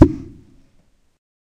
Loosing a plumber tool from the wall. Recorded with ZOOM H1.
indoor, ambient, instrument